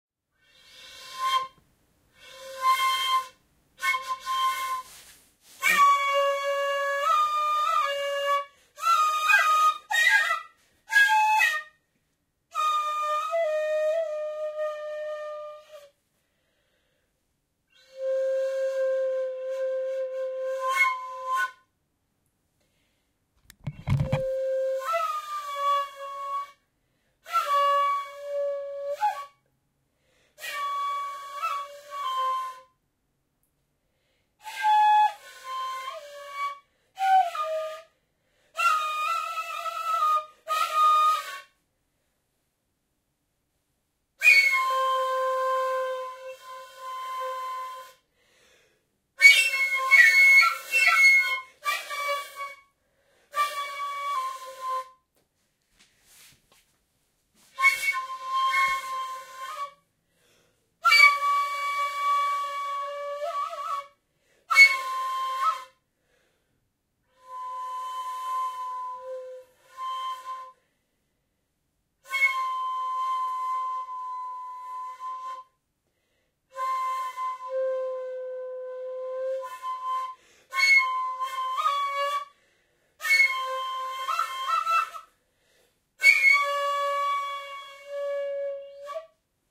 Japan Asian Flute Friend-Improv Small Room
My friend performing some beautiful sounds with an Asian (I believe) flute, have to ask her which flute exactly. You'll hear wind noise, tones and overblowing.
Recorded with Zoom H2n MS-Stereo.
east, ritual, instrument, improvisation, harmonics, buddhist, Japan, meditation, improvised, jam, Asian, flute, ritualistic, Japanese, eastern, ancient, ethno, traditional, extended-technique, spiritual, overtones, music, folklore, woodwind, improv, zen, asia, bamboo